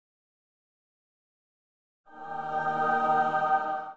Angel Reveal
A short angelic sound for reveling text or images. Heavenly sound. Choir of Angels
Reveal, Angel, Aah